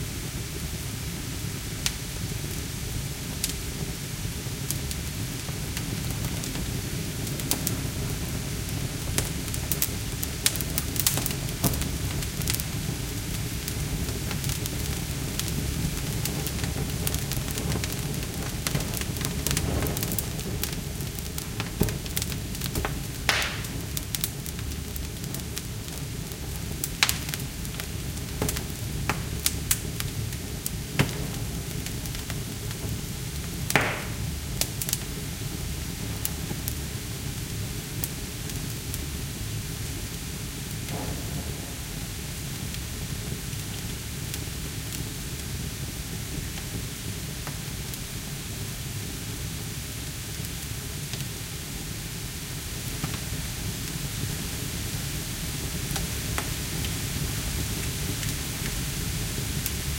20100422.crackling.fire
fireplace burning Olive tree firewood, with sap boiling and crackling. Sennheiser MKH60 + MKh30 into Shure FP24 preamp, Olympus LS10 recorder